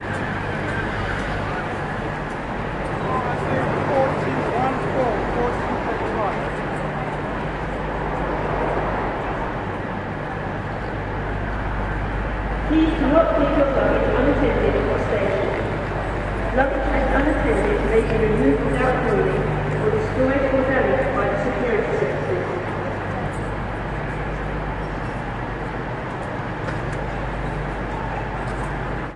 General station ambience with a security announcement - Kings Cross station London. An edit from my sample "Kings Cross platform 2f".